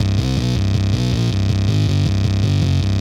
80 Grimey Takka Bass 01
basslines, dist, drillnbass, free, grimey, guitar, hiphop, lofi, loop